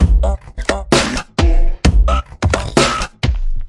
Hiphop/beats made with flstudio12/reaktor/omnisphere2
130bpm, beat, drum, drumloop, glitch, hip, hop, loop, pack, trip